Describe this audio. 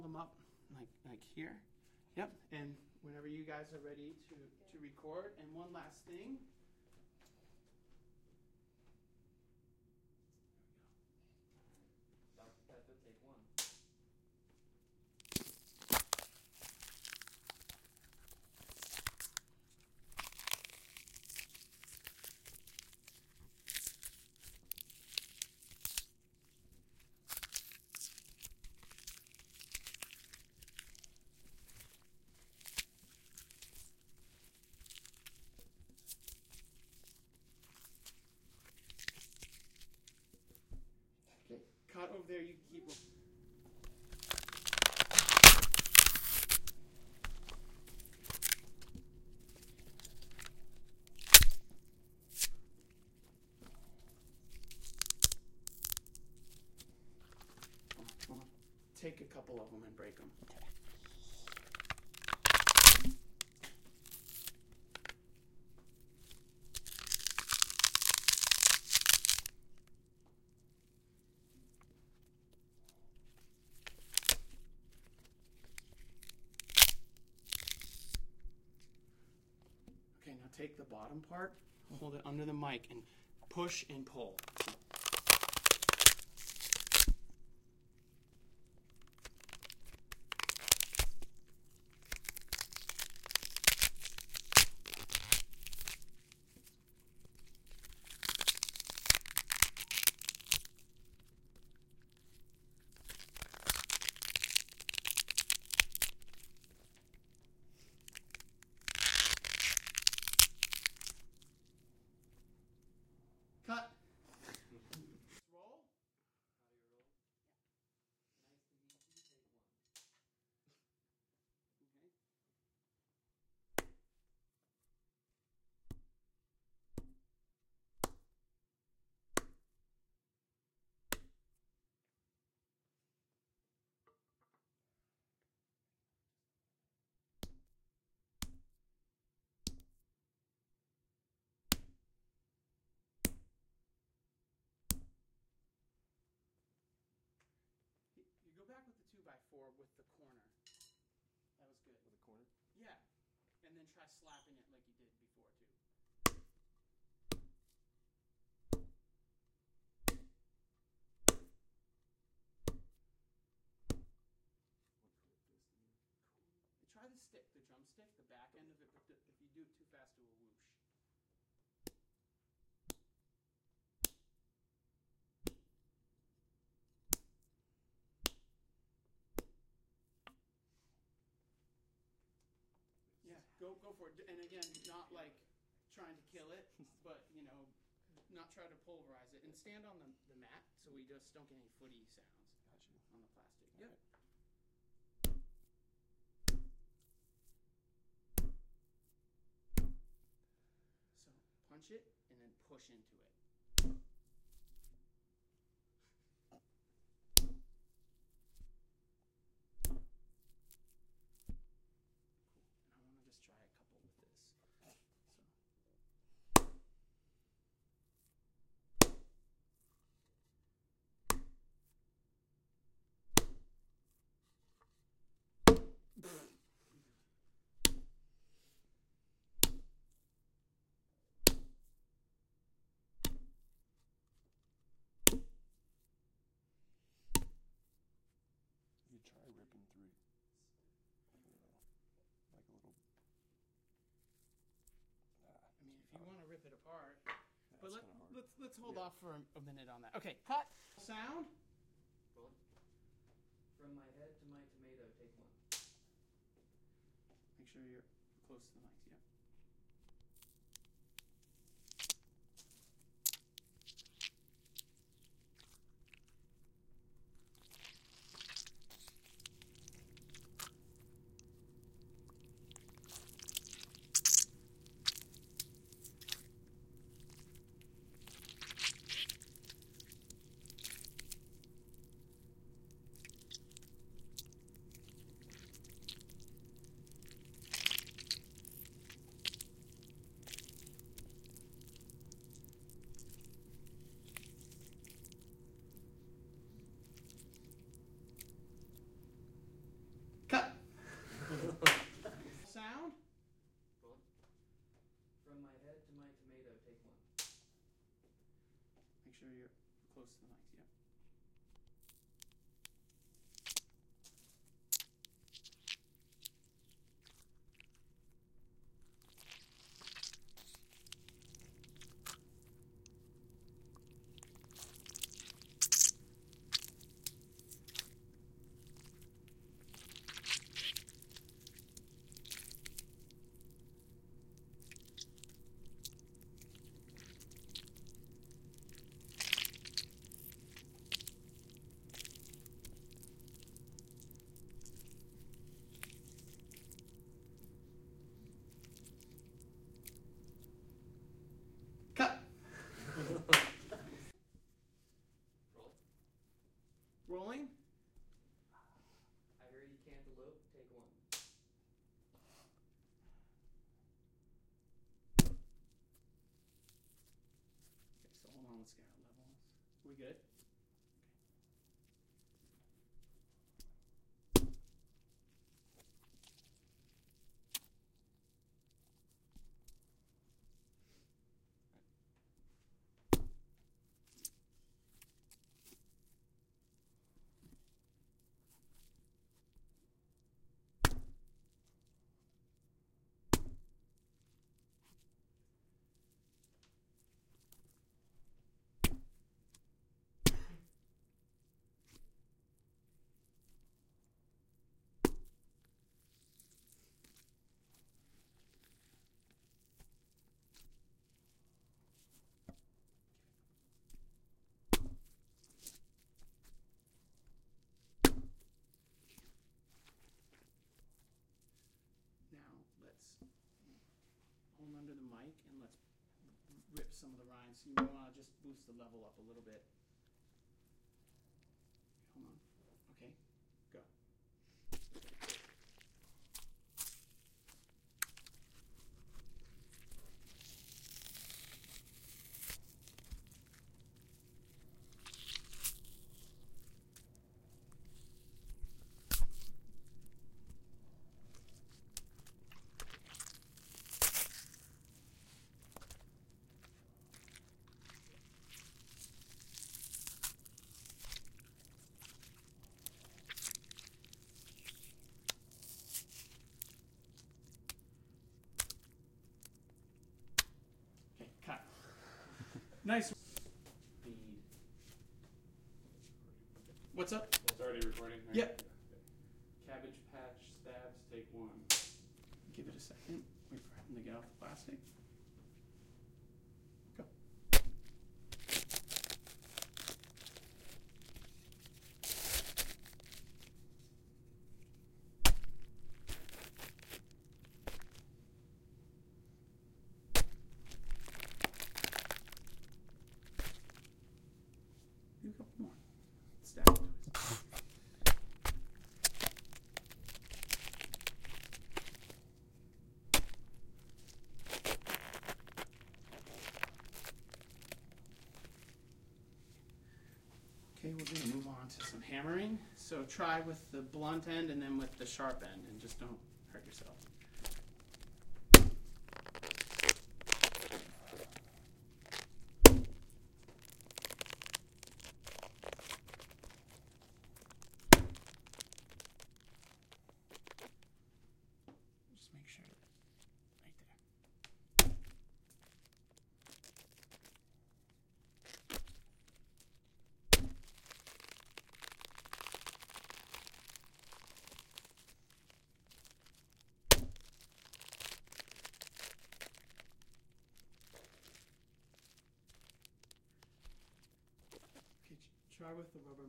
Gore effects session ROUGH pt 1
This is a gore effects session done at the University of Oregon Cinema Studies Program. This is 100% raw with the instructor's voice and bad room tone (note, not recorded in a dead space). We will post edited files of the session soon.